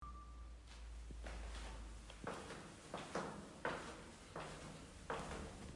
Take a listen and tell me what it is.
Steps and stairs
steps, stairs, quiet